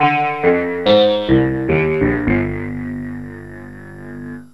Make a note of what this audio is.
a freehanded introplay on the yamaha an1-x.
freehand, syntheline, yamaha, played, an1-x